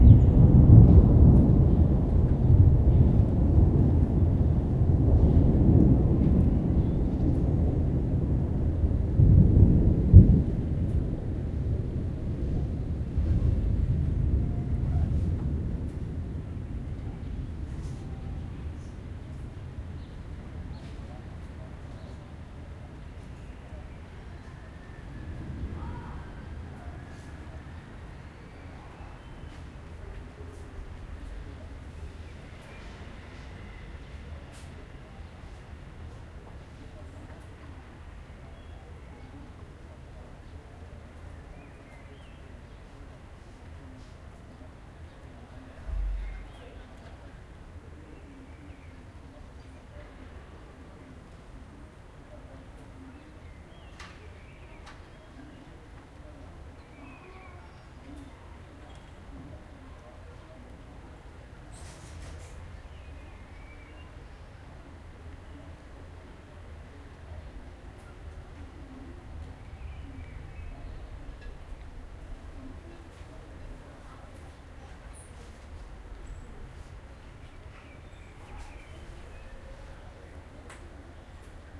thunder birds ping pong
Deep thunder followed by birds and atmo.